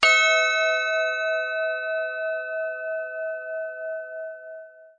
Perfect Gong - Success Sound [based on sounds by Benbocan]
bell, bronze, chime, ding, gong, metal, percussion, perfect, ring, steel, stereo, xy